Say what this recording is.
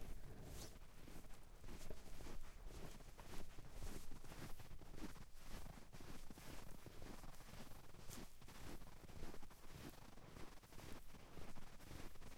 walkinginsnow moderateFRONTLR
Front Pair of quad H2 winter. Footsteps and winter jacket close proximity movement.Hand held while walking, There is wind/handling noise that I left in easily filter out but wanted to leave the option.
crunch, footstep, footsteps, quad, snow, walking, winter